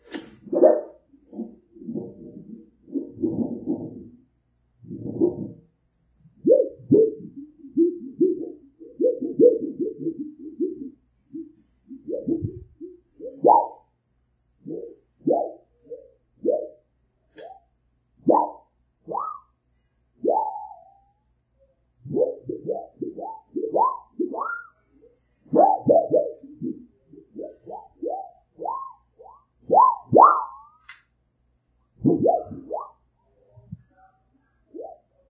Flexing a Piece of Resonating Copper Sheet Metal
Bought a piece of 12"x24"x22AWG copper sheet metal last week and was fascinated by the warble noises it made when I picked it up out of the box.
Recording: Easy Voice Recorder (Android) on a Samsung S7.
Post-Processing: 2-pass noise removal with Audacity due to fluorescent light hum and other background noise.